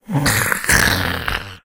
A voice sound effect useful for smaller, mostly evil, creatures in all kind of games.
arcade, gamedev, games, goblin, imp, minion, small-creature, Talk, videogame, vocal